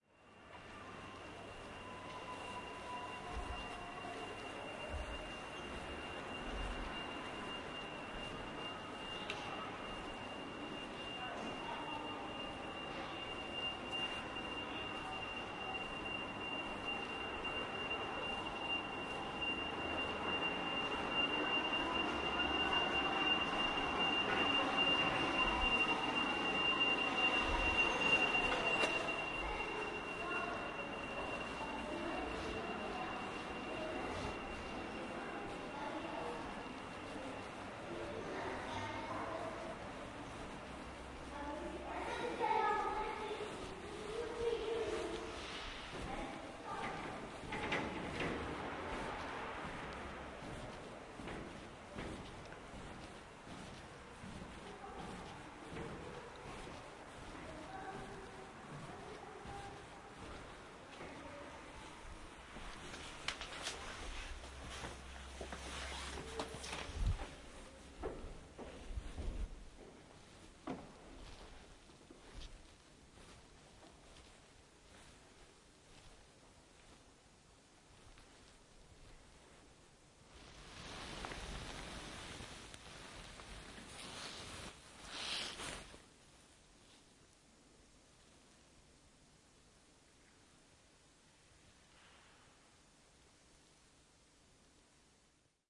0403271130 entrata in una chiesa
27 mar 2004 11:32 Walking from the street to a church.
00:00 Via Dante Alighieri (a small pedestrian street in the centre of Florence, Italy). Electric bus beep.
00:30 In the cloister (Badia Fiorentina). Voices of children.
00:47 Footsteps on a metal covering.
01:02 Opining the church's door.
01:11 In the "silence" of the church.
01:21 I sit down.
children, firenze, sit-down, florence, electric-bus, church, beep, footsteps, silence, street